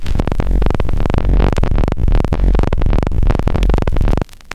2-bar rhythmic loop created from a short noise stab with Adobe Audition